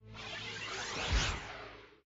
Flashback/transition
Cool sound effect for use with the beginning of flashbacks, transitions etc
dream, flashback, transition